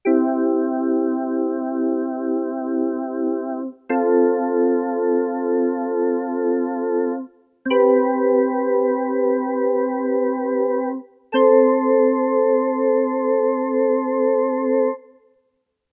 Simple calming sounding synth chords played on FL Studio. Recorded and processed in audacity.
This sound was created at: 30 July 2020, 9:40:11 PM GMT+10
Note: Sounds are better sounding when downloaded.
pad, nostalgic, notes, synth, ambience, electronic, drone, calm, chord, effect, noise, Nostalgia, ambient, soothing, soundscape, atmosphere, sci-fi, sound, ambiance